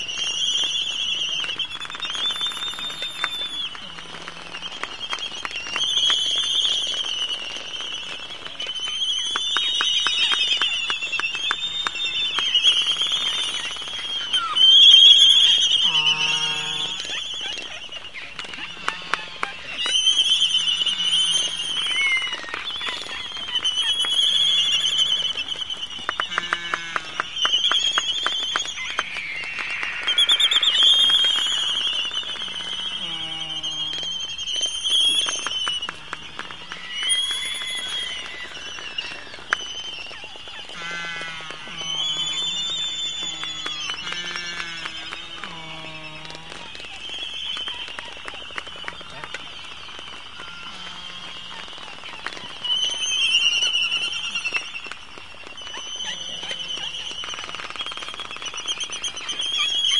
Midway Island Gooney Birds
Laysan Albatross (Gooney Bird) sounds recorded on Midway Island in April of 2001. Yes, all of the sounds you hear are from gooney birds. They have a very wide range of different sounds they can make. This goes on day and night. Field recording using a Sony mini disk recorder. Transferred to digital via an analog path since I had no method for copying the digital file from the mini disk.
Albatross, Atoll, Bird, Birds, Dance, Field-Recording, Gooney, Gooney-Bird, Island, Laysan, Laysan-Albatross, Mating, Midway, Midway-Atoll, Midway-Island, Stereo